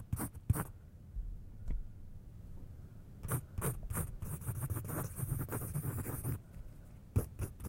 Pencil Scribbling
Writing on paper with a pencil
drawing, pencil, scribble, scribbling, writing